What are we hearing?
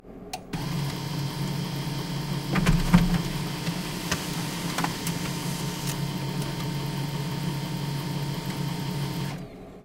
printerFax Print1page
Office fax-printer print one page. HP LaserJet 1536dnf MFP. Loud background noise is ventilation.